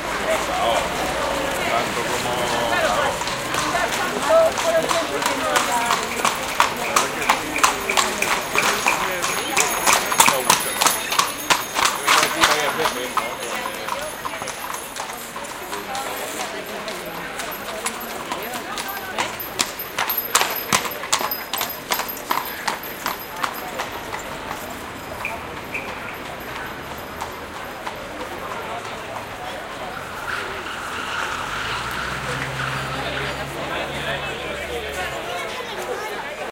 20060413.street.noise02
street ambiance, with people talking and two horse carts passing/ ambiente de calle con gente hablando y dos coches de caballos que pasan